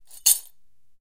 glass - baby food jar - shards shaken in ceramic bowl 04
Shaking a bowl filled with shards of a broken glass baby food jar.
bowl, broken, broken-glass, ceramic, glass, jar, shake, shaken, shaking, shard, smashed